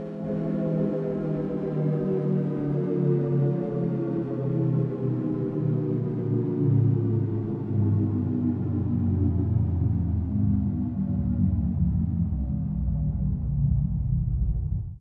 A bell-tone generated in CoolEdit, with a sliding pitch. Noise reduced.
synth, effect, processed, drop, bell, bell-tone, pitch-slide, tone, bass, atmospheric, pitch